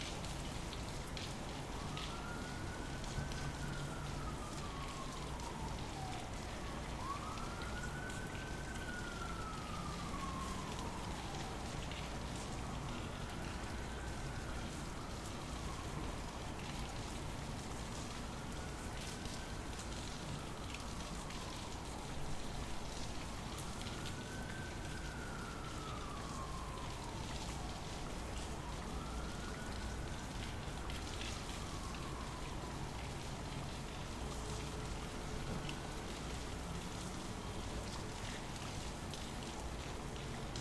Sound of a police or fire siren in the distance in the rain in a city